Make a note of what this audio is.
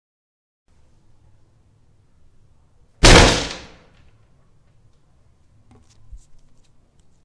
Hit Table 03
Someone must be very nervous and cashed at the table...
Anger, Fury, Hit, HitTable, Table, Violence, Violent